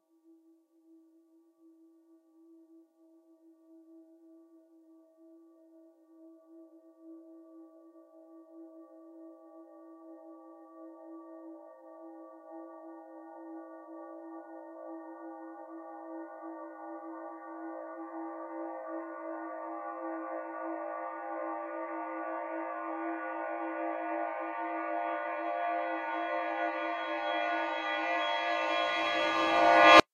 Clang Cinematic Reversed
A transitional or climactic cinematic sound.